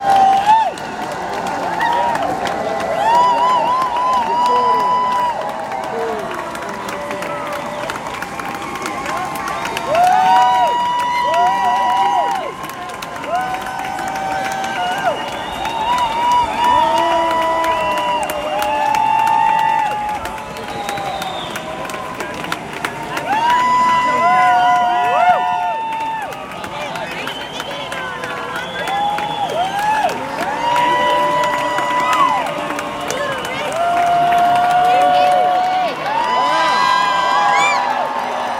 Cheering in a baseball setting
clapping, cheering, crowd, baseball, sports, applause, ballpark